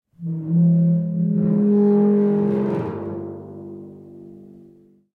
SuperBall scrape on timpani drum head. Special FX. Try running through some guitar FX or long reverb. Reverse for added fun!